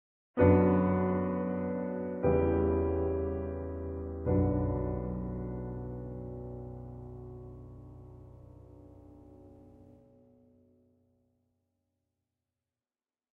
A sad phrase in the style of Schubert, Liszt or contemporaries.
sorrowful, sad, woeful